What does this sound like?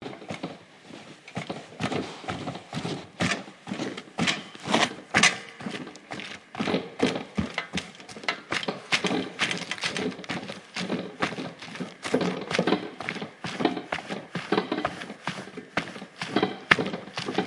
MySounds GWAEtoy Walkingfail
field, recording, TCR